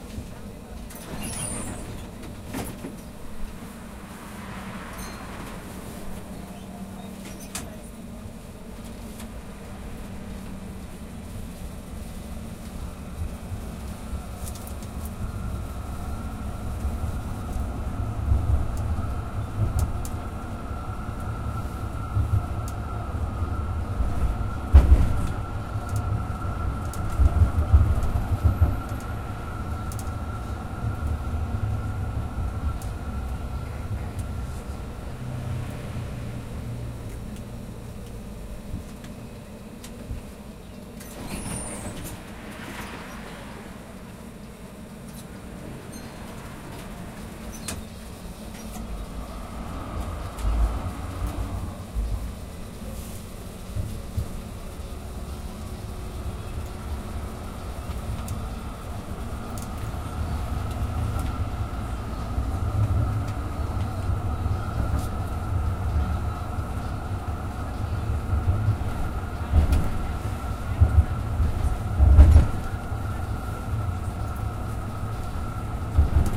tram inside 02
inside a Melbourne tram, music playing through headphones by passenger